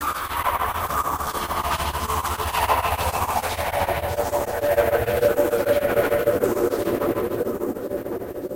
A wide atmophere overwelming in disier